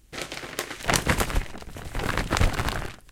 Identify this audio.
Shaking of a beef jerky bag. Recorded very close to two condenser mics. These were recorded for an experiment that is supposed to make apparent the noise inherent in mics and preamps.
plastic, shake-bag, crinkle, beef-jerky